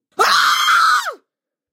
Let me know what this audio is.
Woman screaming at the top of her lungs due to grief, pain, or freight
horror, spooky, fear, Scream, grief, emotion, Woman, pain, scary, agony